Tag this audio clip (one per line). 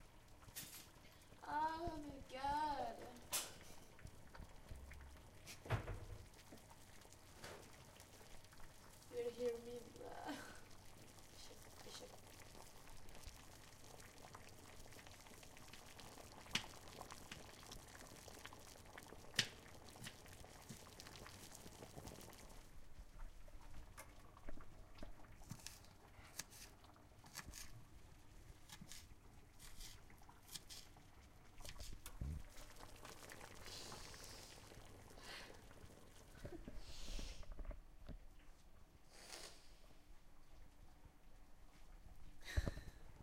Kortepohja
skyl
Jyv
Kitchen